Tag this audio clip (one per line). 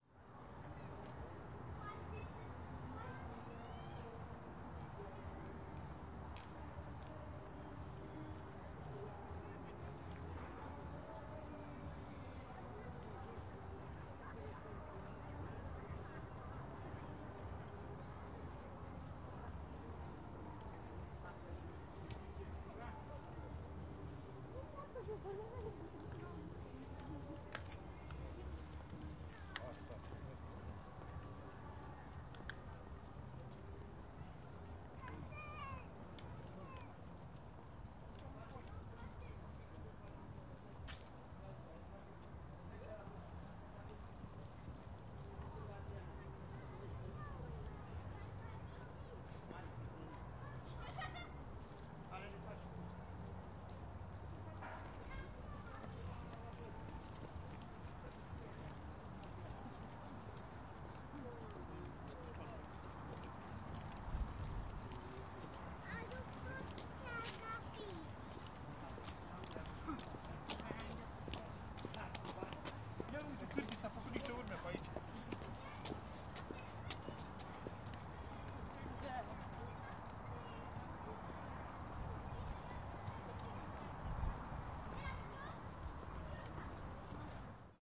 field-recording
park